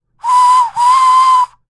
Maquina de vapor
the tractor horn